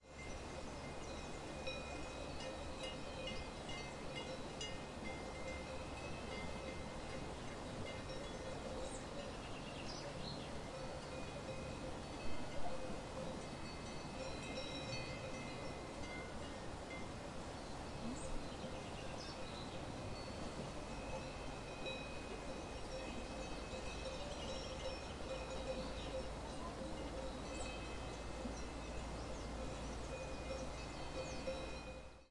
Swiss hills with animals with bells
You hear bells from a group of sheep or goats in the distance. Recorded in Entlebuech, Switzerland.
ambiance, ambience, ambient, animal, atmos, atmosphere, background, bell, bells, birds, field, field-recording, Fieldrecording, goat, goats, gras, hill, hills, mountain, nature, sheep, sheeps, swiss, switzerland